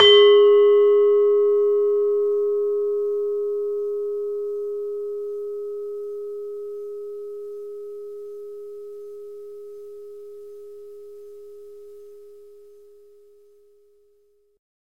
Sample of a demung key from an iron gamelan. Basic mic, some compression, should really have shortened the tail a bit. The note is pelog 4, approximately a 'G#'